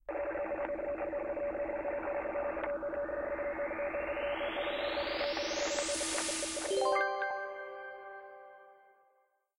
Uploading Data
I mixed together the works of
To make a cool sort of loading transition with a satisfying success at the end. This is an example of great works that I just mixed together, credit should go to the real authors.
soundesign, ui, data, loading, future, signal, collecting, space, cool, commnication, success, fiction, sci-fi